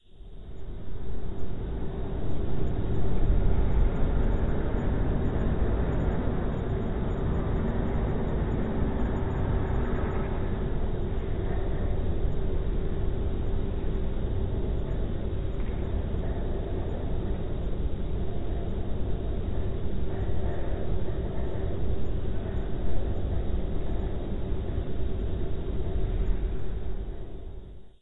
Abandoned Metro Tunnel 07
Abandoned Metro Tunnel
If you enjoyed the sound, please STAR, COMMENT, SPREAD THE WORD!🗣 It really helps!
abandoned, atmospheric, dark, game, metro, track, train, tunnel, underground